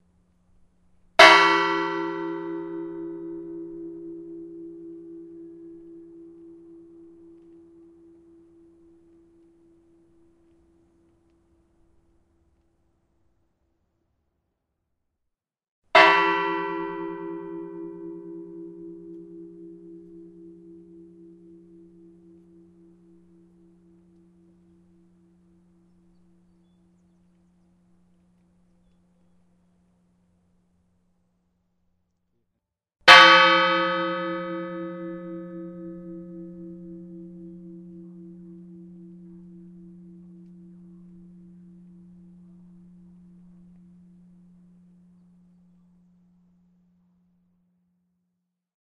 Swallowtail Lighthouse Old Fog Bell
This is the old lighthouse fog bell at Swallowtail Lighthouse on Grand Manan island, New Brunswick, Canada.
This recording contains three strikes: twice at the bottom of the bell (harder then lighter) and once halfway up the bell. A five-pound steel hammer was used to strike the bell (by hand).
bell, field-recording, fog, grand-manan, lighthouse, swallowtail